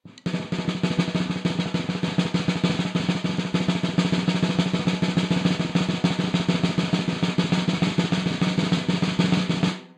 Snare roll, completely unprocessed. Recorded with one dynamic mike over the snare, using 5A sticks.